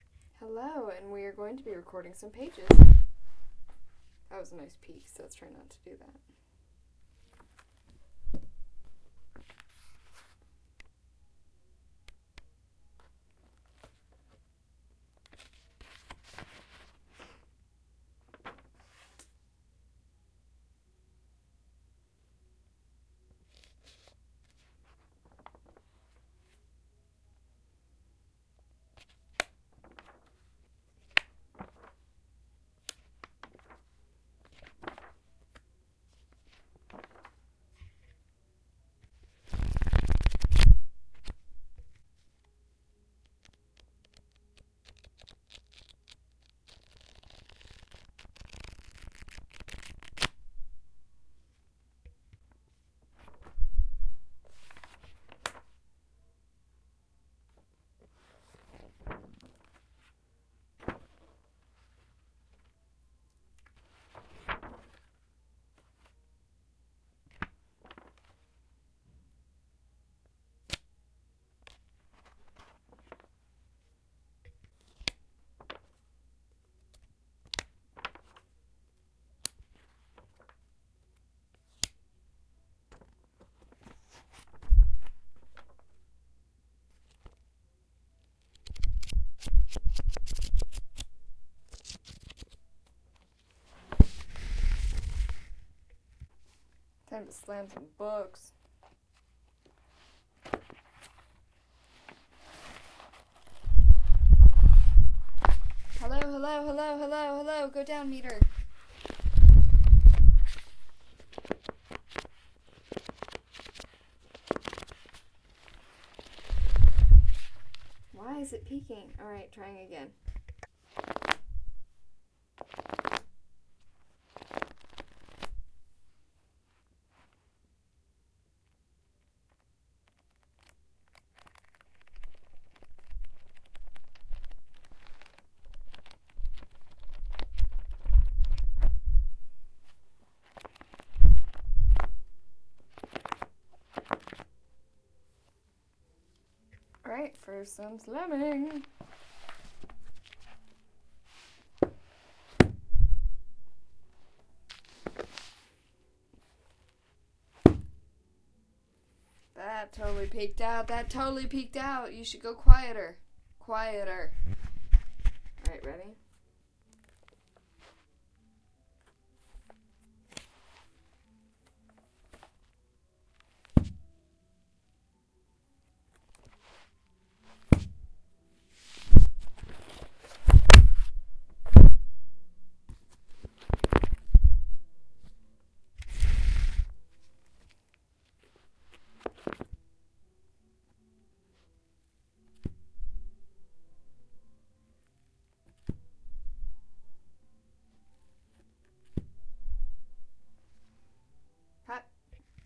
pages turning
Me rustling pages
book, leaf, page, pages